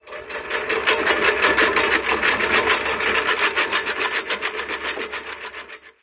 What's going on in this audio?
hand mower starts rolling
a non motorised lawn-mower starts rolling
hand-mower, handmower, lawn, mower